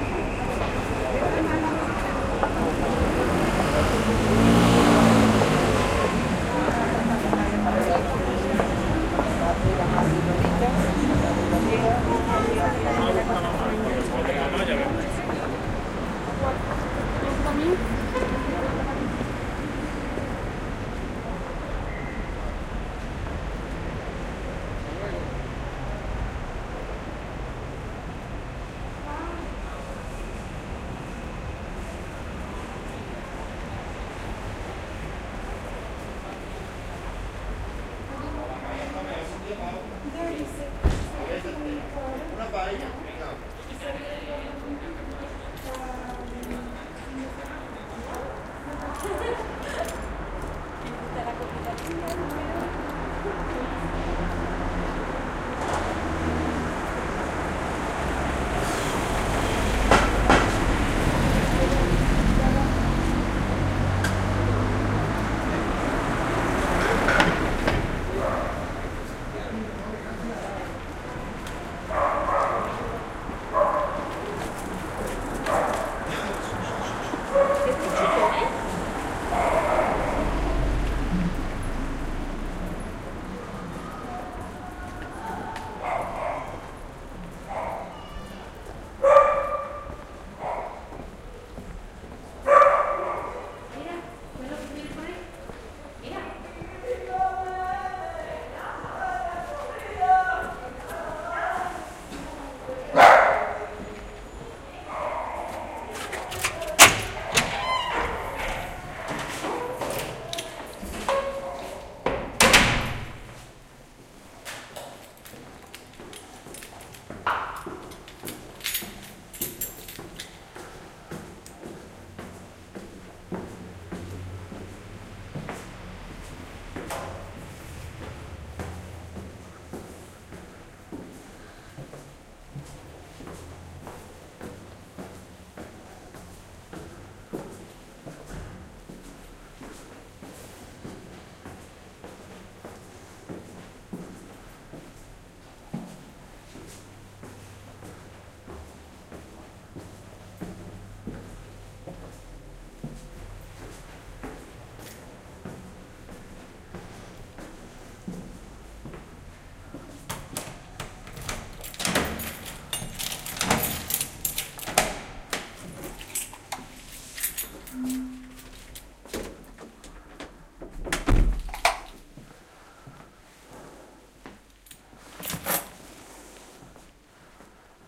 20100322.walking.home
recorded as I walked home, from busy streets with lots of traffic to the relatively quiet area where I live. Near the end you can hear my steps upstairs.